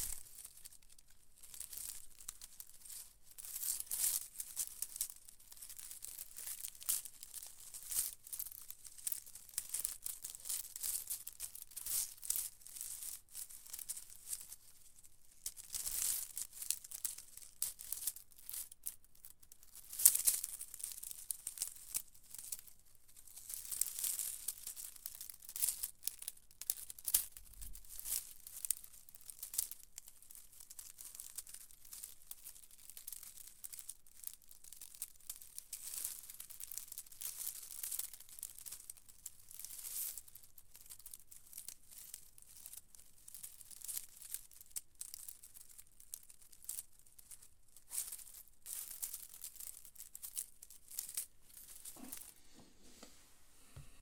recorded old leaves with AKG PERCEPTION 170 INSTRUMENT CONDENSER MICROPHONE
cracking, leaves, needles